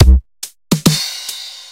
Skrillex Equinox Style Drumloop
Tried to emulate the drum sound in Skrillex-Equinox. I love how low pitch and punchy these snares and kicks are so heres my interpretation. Layered accoustic drums with saturated electro ones. Enjoy!
loop, 909, Skrillex, Saturated, drum, Accoustic, Tape, kick, combo, snare, Punchy